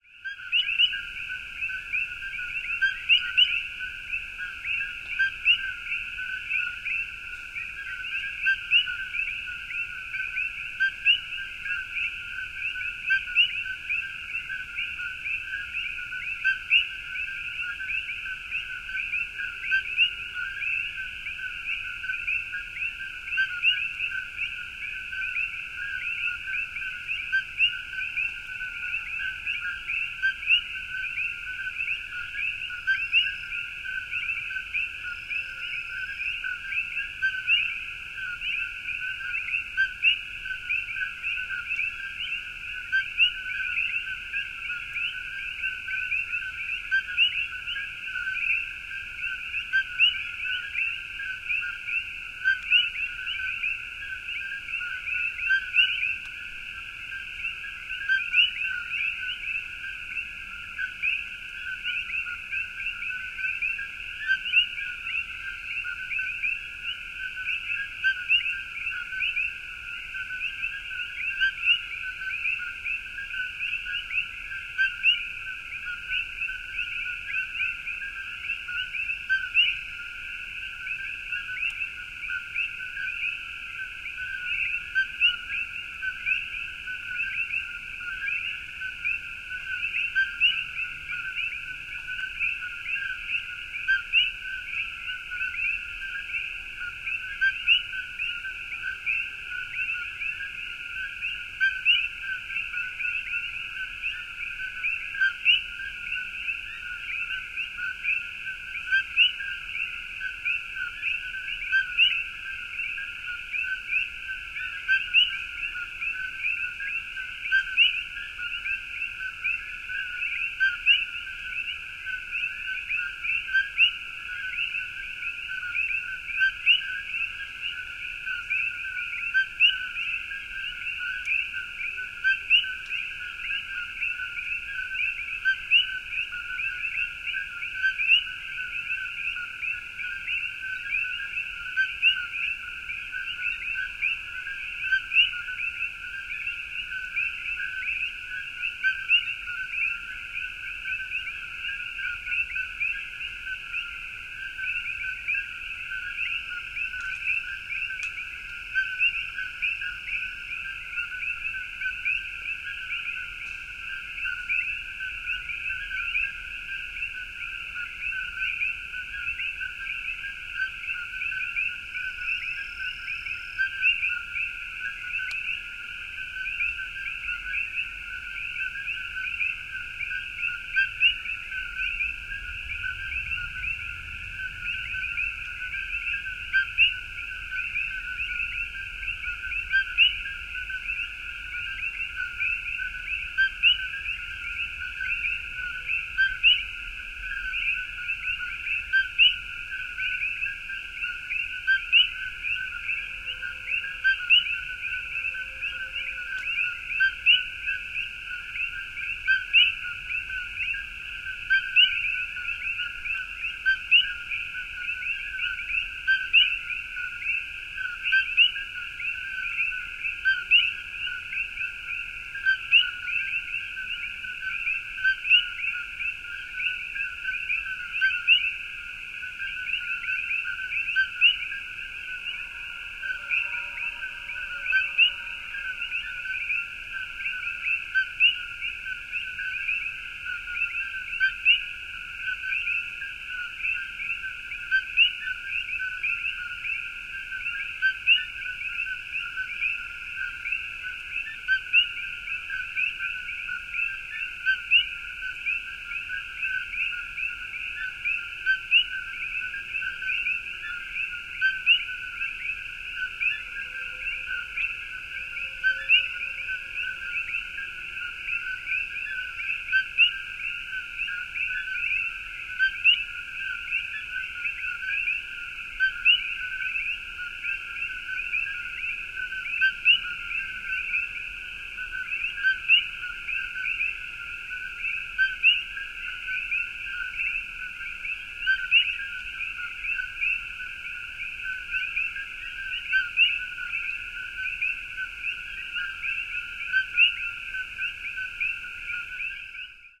Stereo ambient field recording of coqui frogs calling in the evening in a eucalyptus forest on the Hamakua Coast of the Big Island of Hawaii, made using an SASS.
Coqui-Frogs, Forest, Frogs, Hawaii, SASS, Stereo, Tropical